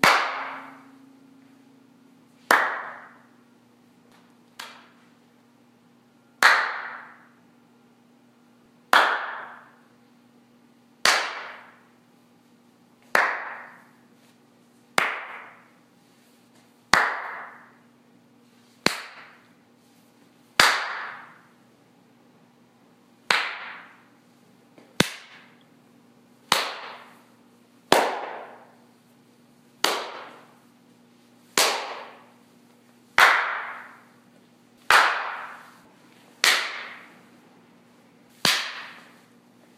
several different tonalities & intensities of claps in the foyer
kind of a small-hall-like reverb
Recorded at a coffee shop in Louisville, CO with an iPhone 5 (as a voice memo), edited in Audacity